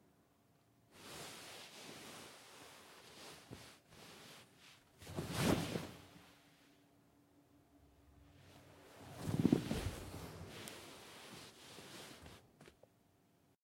Lying down, rubbing pillow, sitting up quickly, then dropping back down